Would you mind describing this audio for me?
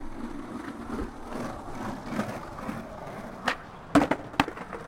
skateboarders attempting tricks - take 05
grinding, skateboard-trucks, wheels